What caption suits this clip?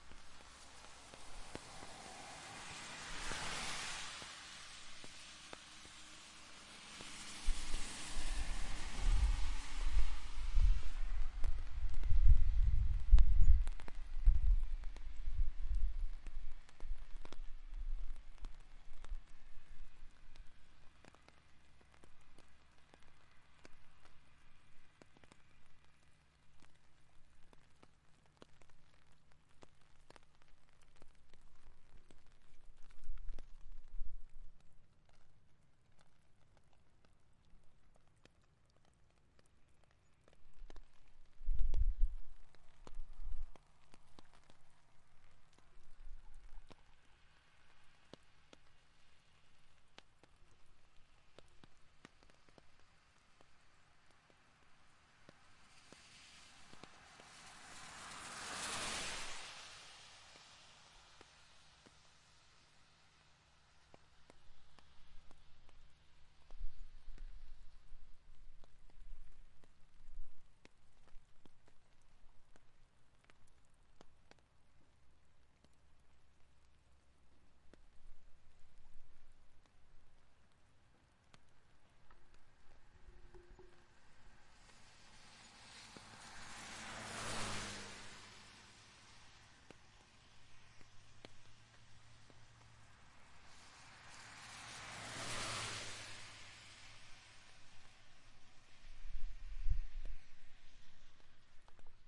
Cars - going by at 45 MPH - while raining
Light traffic on a small highway going by at about 45 MPH with rain coming down and water on the road.
ambience, car, cars, city, drive, driving, field-recording, highway, noise, rain, road, street, traffic, whoosh, wind, woosh